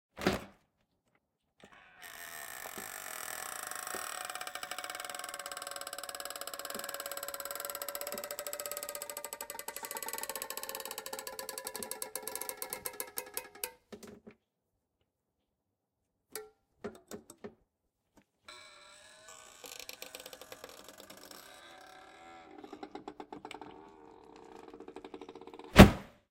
The sound of a hilariously squeaky dishwasher door that a friend and I found in an apartment in Caloundra. Recorded using the Zoom H6 XY module.
cartoon, close, creak, open, squeak